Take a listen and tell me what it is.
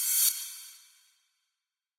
reverse cymbal
Reversed cymbal with a touch of re verb
cymbal, reverb, reverse